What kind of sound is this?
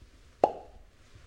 Bottle Popping - Edited
So I've applied the EQ and already you can hear the difference in the properties of the sound.
party, celebrations, bottle-popping, cork, bottle